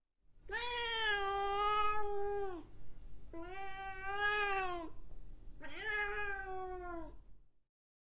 Slightly angry cat. She is a beautiful Siamese cat called Agostina. She is angry for the recording because i pressed his tail.
Information about the recording and equipment:
-Location: Living room.
-Type of acoustic environment: Small, diffuse, moderately reflective.
-Distance from sound source to microphones: Approx a few centimeters.
-Miking technique: Jecklin disk.
-Microphones: 2 Brüel & Kjaer type 4190 capsules with type 2669L head amplifier.
-Microphone preamps: Modified Brüel & Kjaer type 5935L.
-ADC: Echo Audiofire 4. (line inputs 3 & 4).
-Recorder: Echo Audiofire 4 and Dell D630C running Samplitude 10.
Eq: Compensation only for the response of the microphones (In this case for flat response at 60º. See Brüel & Kjaer type 4190 datasheet).
No reverb, no compression, no fx.
Domestic-sounds, Binaural, Fx, Field-recording, Animal-sounds, Head-related